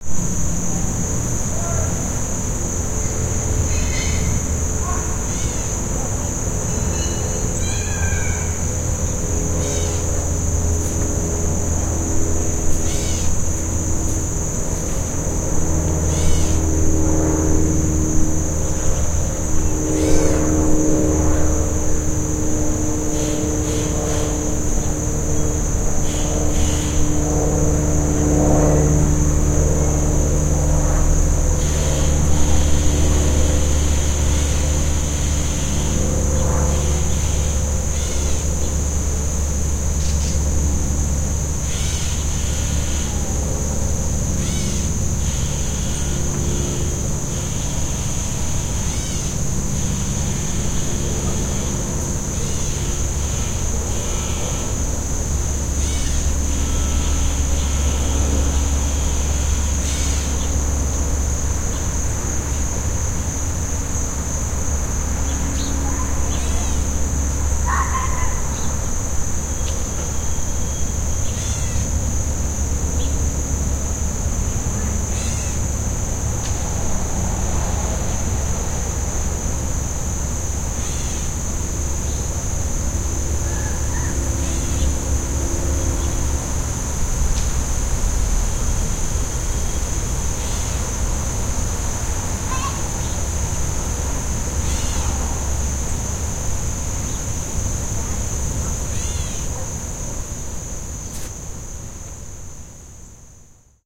neighborhood amb01
Cicadas drone as a man shouts and metal crashes together. A light plane approaches, while a child and a crow make similar calls. The crow continues to caw- then is answered by an electric buzzer. Windchimes gently tinkle. The plane passes overhead. The buzzer and crow have a conversation. We hear from the children again, as the plane fades away.
Recorded from a second story window using a MicroTrack digital recorder and the stock stereo mic.
ambience, buzzer, chime, cicadas, crow, field-recording, neighborhood, plane, suburban